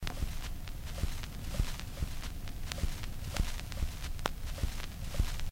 hiss of a needle on an old record (different)
glitch, click, rhythmic, hiss, vinyl, detritus, turntable, field-recording